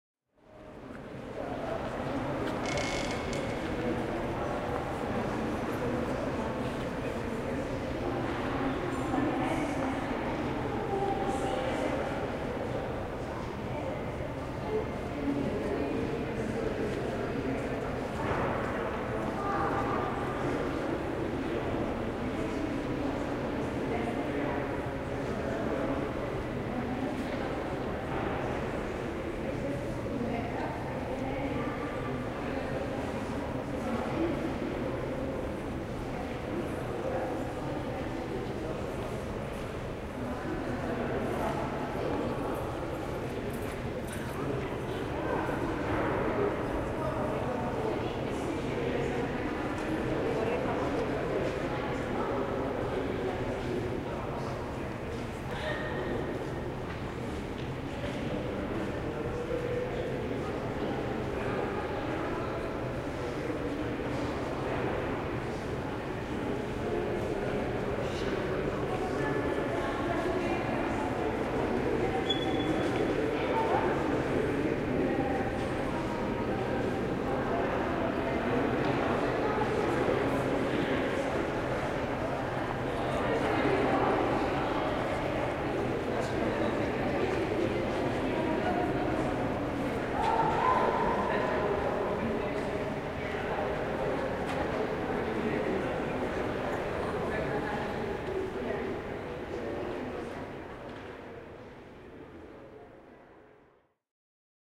British Museum ambience 2
The ambience of the large spaces of the British Museum in London. Begins with the creaking of a chair. There are voices and lots of natural reverb due to the vast size and hard surfaces. There is also a general background noise from ventilation and heating systems. Minidisc recording May 2008.